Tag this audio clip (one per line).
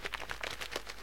That
little
paper